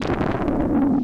sherman shot bomb29
I did some experimental jam with a Sherman Filterbank 2. I had a constant (sine wave i think) signal going into 'signal in' an a percussive sound into 'FM'. Than cutting, cuttin, cuttin...
analog, analouge, artificial, blast, bomb, deep, filterbank, hard, harsh, massive, perc, percussion, sherman, shot